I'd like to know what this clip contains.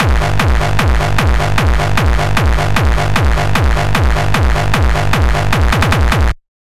xKicks - Whispers of Deom
There are plenty of new xKicks still sitting on my computer here… and i mean tens of thousands of now-HQ distorted kicks just waiting to be released for free.
bass, bass-drum, bassdrum, beat, distorted, distortion, drum, gabber, hard, hardcore, hardstyle, kick, kickdrum, techno